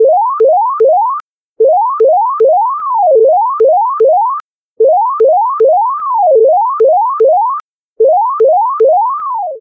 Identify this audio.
I worked on the basis of a whistle by integrating an acceleration of the tempo that I duplicated periodically (every two times ) to give rhythm to the sound. Then I added a silence, then I reversed some of its body to give a real melody.